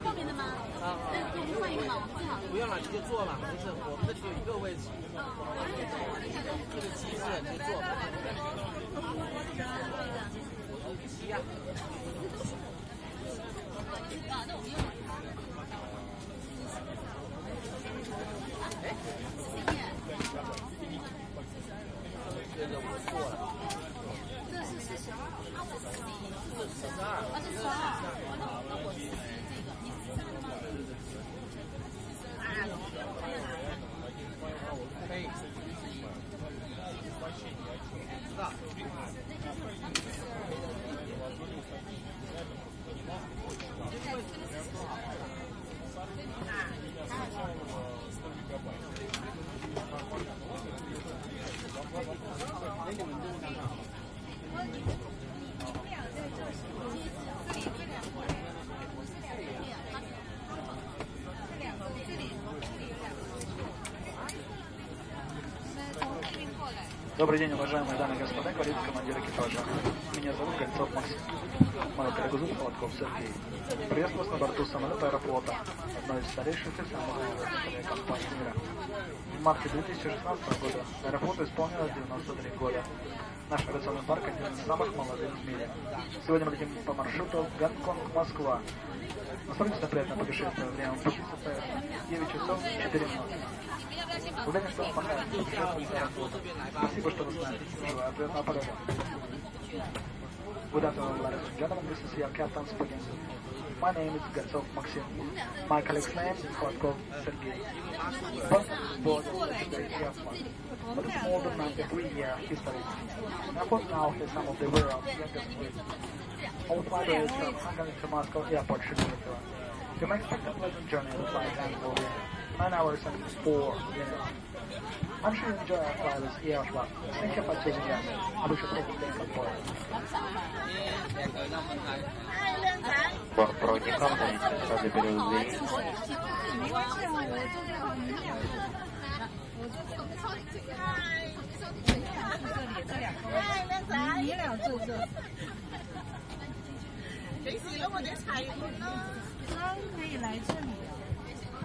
Boarding passengers on the plane in Hong Kong Airport
Aeroflot aircraft cabin interior, Hong Kong International Airport, boarding passengers. Route HKG-SVO, Boeing 777-300ER. October 2016.
ZOOM H2n MS mode
airliner, cabin, hong-kong, interior